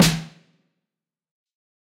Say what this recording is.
Dolus Ludifico snare
drum, snare, sample, DolusLudifico